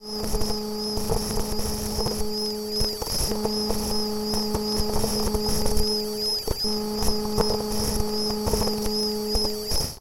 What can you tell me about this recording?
Modulated sinewave in the background with three sustained buzz sounds and random static/whitenoise.